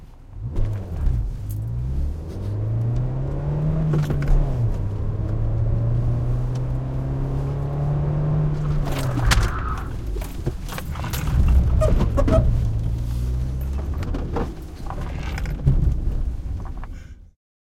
peugot 206 car interior accelerating and breaking suddenly suspension and tire squeek bumping objects inside vehicle
Recorded with a Sony PCM-D50 from the inside of a peugot 206 on a dry sunny day.
Driver goes mad and races around. Objects inside car bump and keys in ignition rattle.